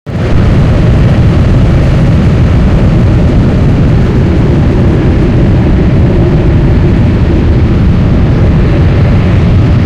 Jet engine static test sound.